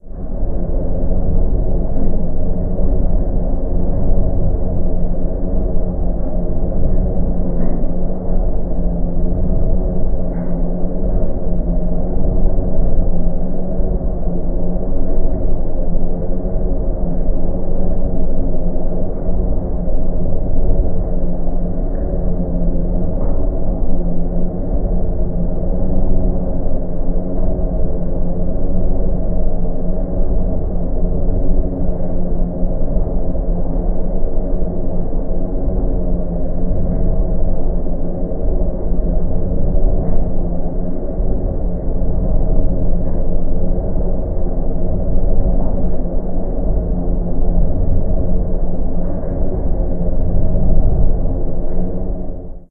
Atmospheric sound for any horror movie or soundtrack.
Atmosphere, Halloween, Horror, Scary, Terror